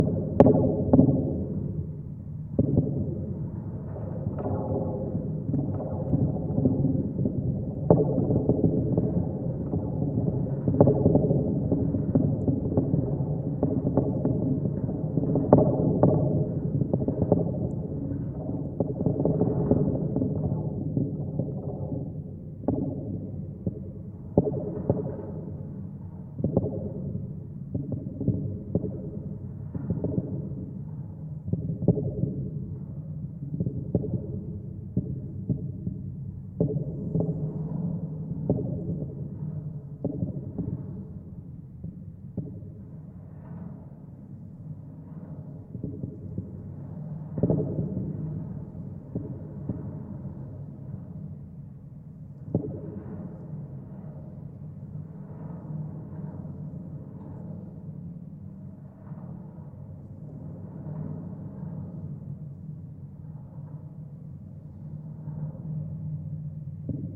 GGB 0307 suspender NE16NE
Contact mic recording of the Golden Gate Bridge in San Francisco, CA, USA at NE suspender cluster 16, NE cable. Recorded February 26, 2011 using a Sony PCM-D50 recorder with Schertler DYN-E-SET wired mic attached to the cable with putty. Outer cables were quite active in this soundwalk session.
field-recording, bridge, contact-microphone, Marin-County, contact, DYN-E-SET, steel, contact-mic, San-Francisco, Golden-Gate-Bridge, Sony, mic, Schertler, wikiGong, cable, PCM-D50